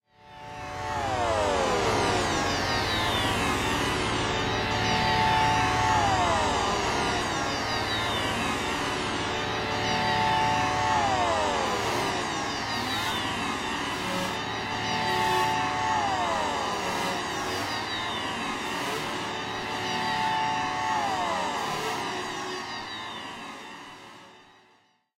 digital intelligence
synth sound with a random nature
random, atonal